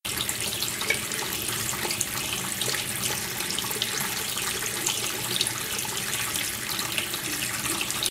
Sound of running tap water
running, sound, tap, water